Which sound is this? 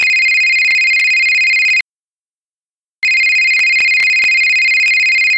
Cellphone ringing sound made with Audacity.
Phone-ringing, Phone, ringing, ring, telephone